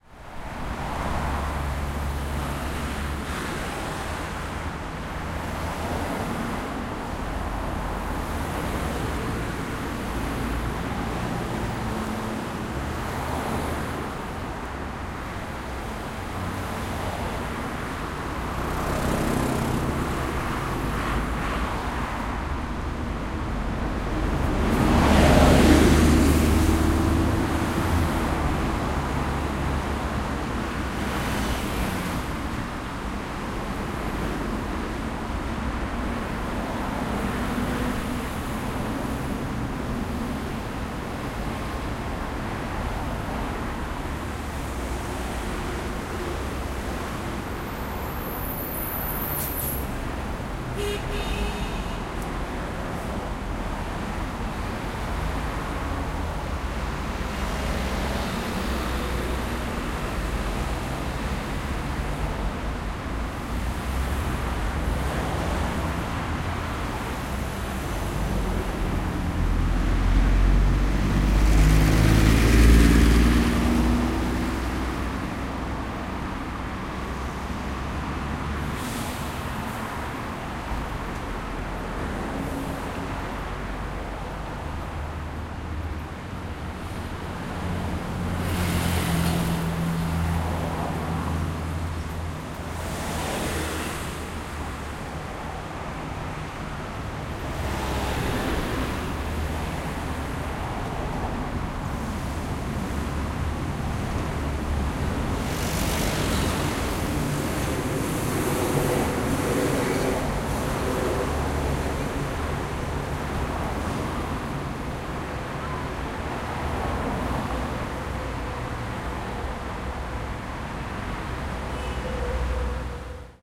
0352 Intense traffic
Intense traffic in Seosomun-ro.
20120705